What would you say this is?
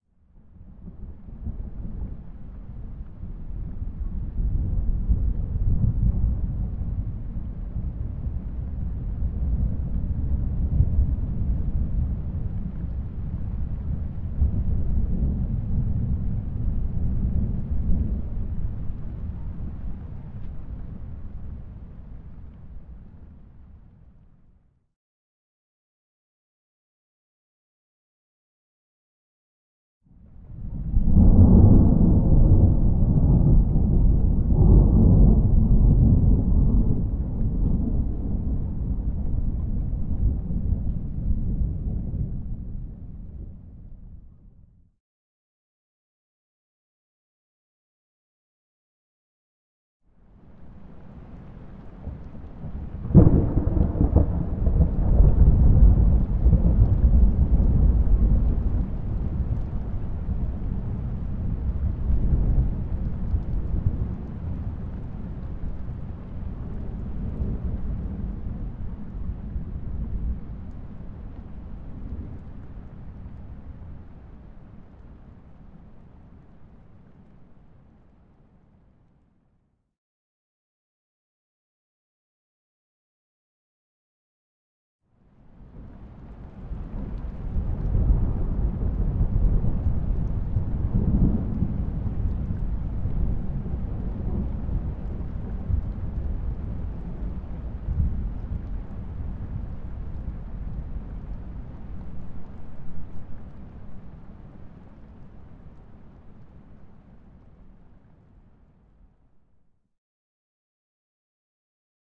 Samples of distant thunder.